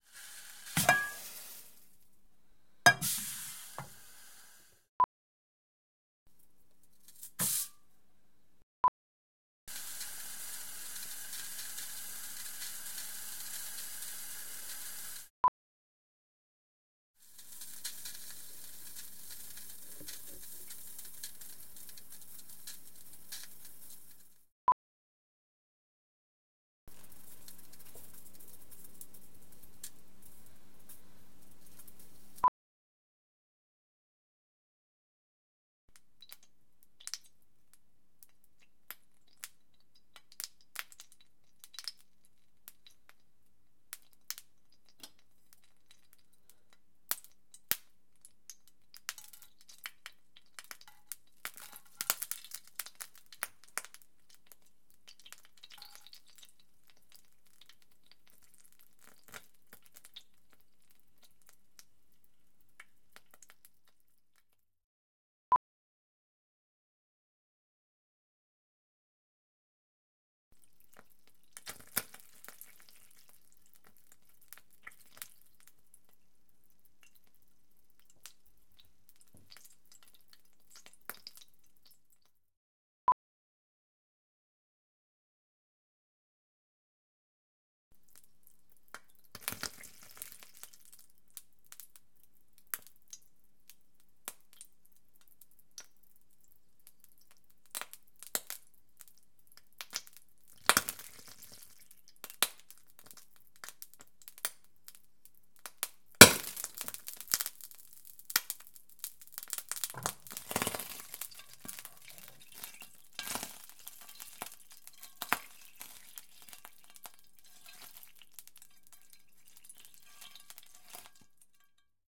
Hot oil in pan
catering, stereo, pack, tap, field-recording, water, running